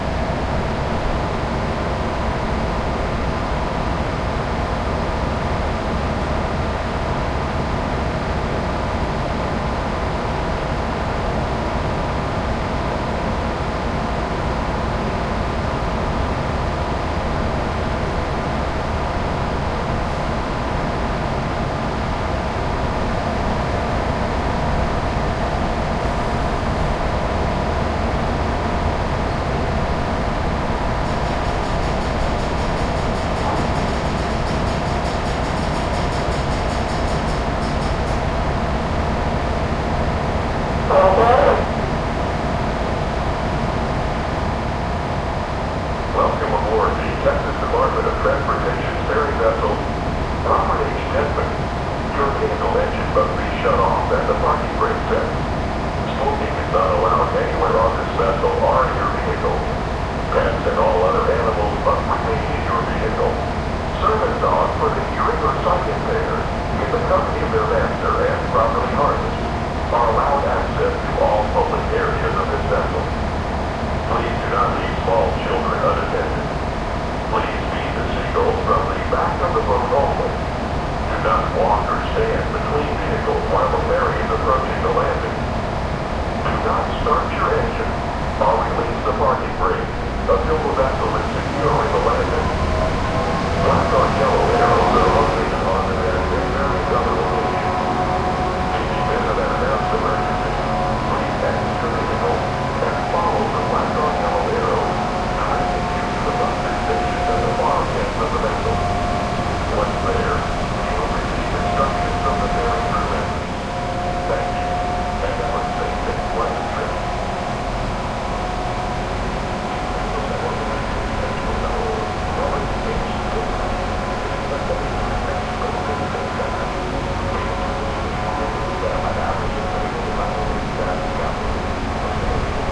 bolivar boarding ferry
waiting for the ferry to start between bolivar and galveston
announcement, boat, distorted, engine, ferry, field-recording, hum, loud, noise, noisy, roar, ship